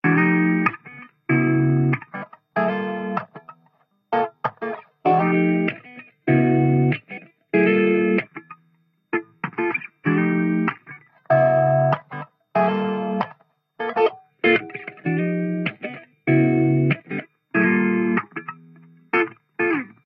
Funky Soul Acid Guitar 1 - 96bpm
96bpm, ambient, cool, fender, funky, groovy, guitar, improvised, lofi, loop, oldtape, quantized, soul, vintage